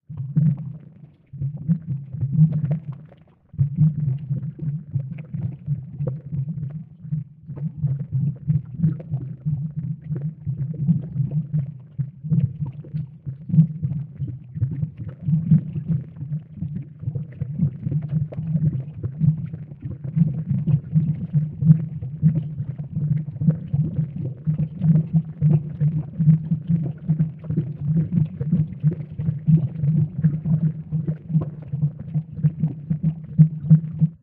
Layer 2 of being poisoned. Sound made for a cancelled student game.
Bubbles, Poison, Videogame